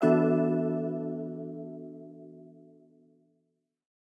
Chord Alert Notification
A pleasant but attention-grabbing sound, designed for use as an alert/notification noise or a sound effect.
For those of you who like the music theory side of things, this is an Em9sus4 chord - ambiguous modality